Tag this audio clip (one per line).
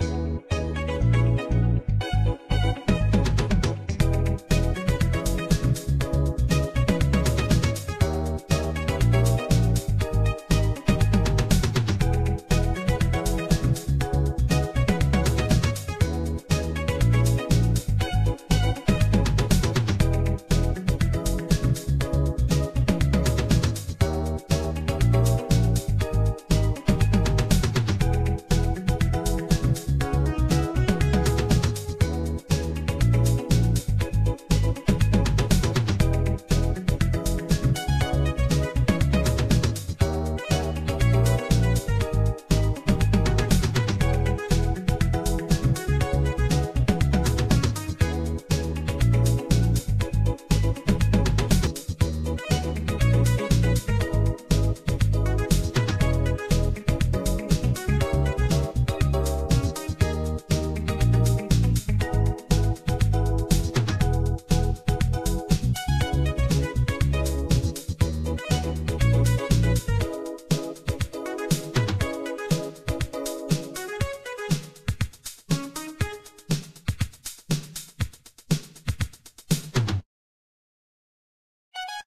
80s
bass
beat
comet
disco
drum
electronic
loop
portasound
pss170
retro
slide
snare
synth
techno
yamaha